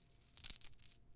Coins jingling in someones hand or pouch